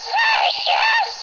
Flowers Like to Scream 02
noise, not-art, psycho, screaming, stupid, vocal, yelling